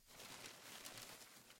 Newspaper Crumble
News, paper, crumbling